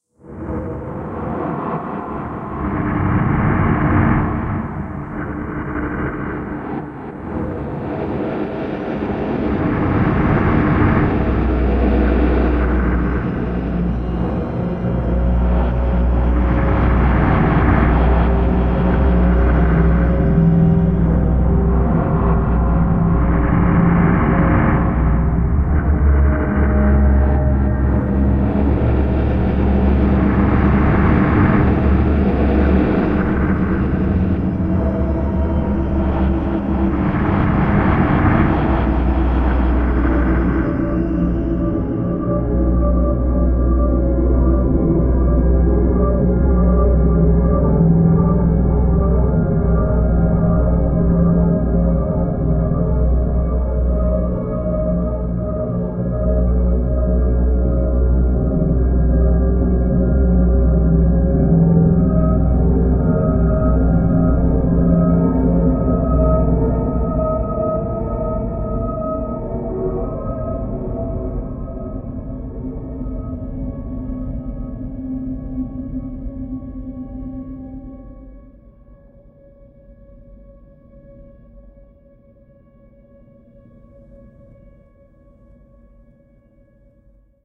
nightmare drone
Ancient 2010 guitar recording and mangling utilising my trusty Line 6 POD X3, different takes with different effects mixed into a terrifying sound design composition.
dark, terror, spooky, creepy, ominous, monstrous, eldritch, horror, fear, mysterious, terrifying, suspense